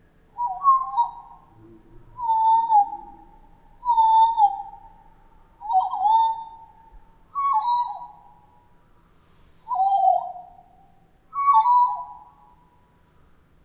Exotic Creature 1
The intergalactic hero stalks through the alien forest. Overhead in the trees a majestic avian flutters down to land on a branch, whistling sweetly. If this describes your sound needs you've found the perfect sound!